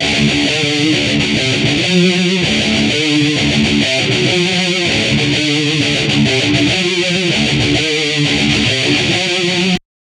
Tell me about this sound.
REV LOOPS METAL GUITAR 2
rythum guitar loops heave groove loops
groove, guitar, heavy, metal, rock, thrash